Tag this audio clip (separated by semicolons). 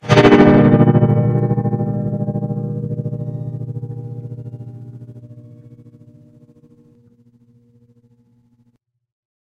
acoustic; guitar; pad; trem; tremolo